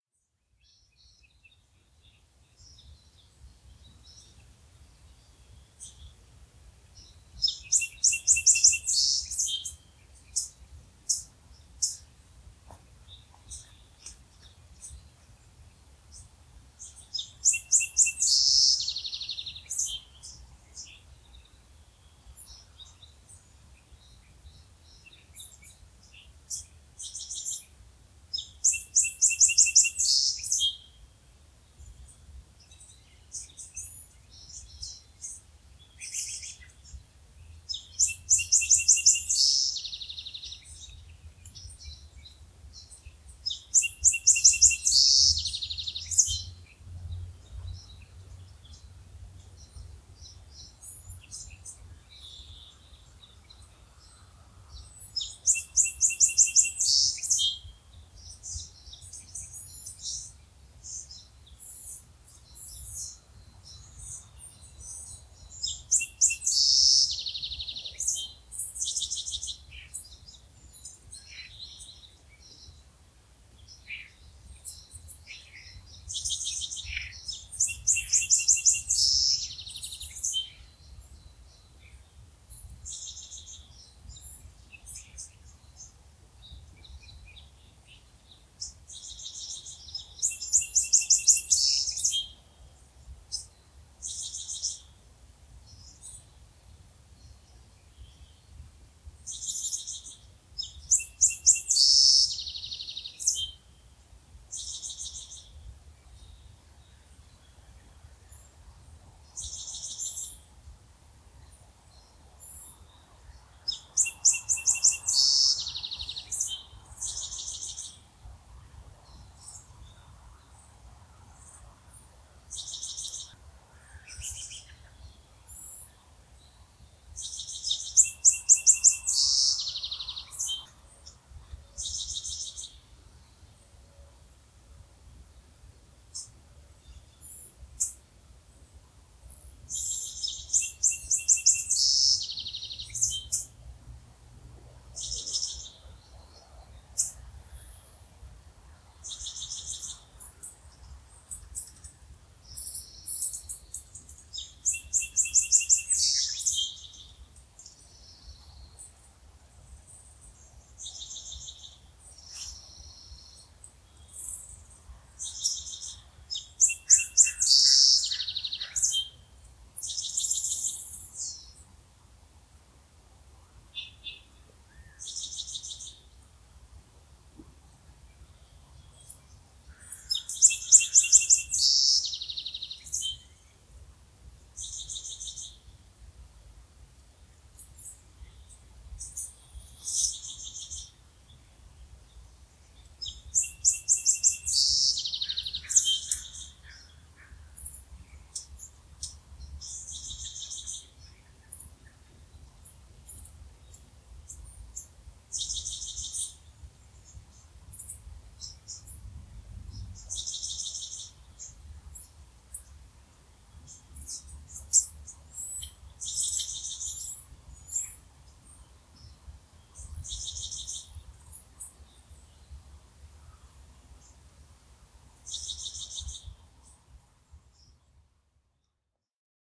Green Space Small Town 03202020
Various birds sounds recorded at a green space in Ferndale, WA USA. Recorded with my cell phone and processed using Audacity. Everyone was home and the birdsong was undisturbed.
Ambient, Bird, Covid, Field-Recording, Green-Space, Quiet